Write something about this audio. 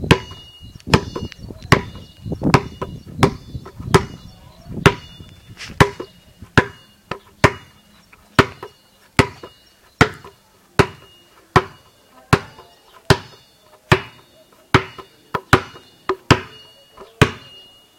Basket Ball loop
This is a sound of a basket ball play. I've recorded it for no purpose! Just for fun...
basketball, birds, playing, singing